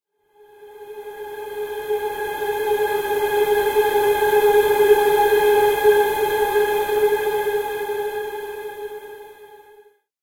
Run Now
A warbling suspenseful pad sound.
edison, eerie, pad, single-hit, warble